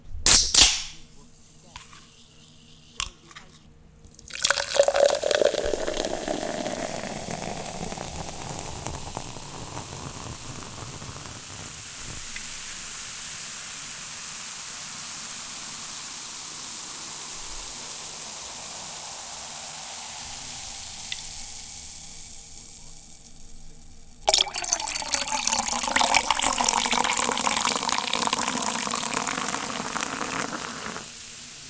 Opening soda can and pouring soda
carbonated, drink, pouring, soda